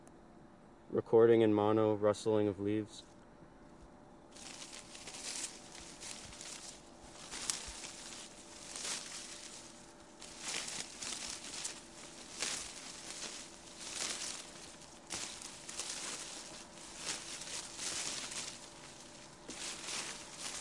russling leaves
field-recording, forest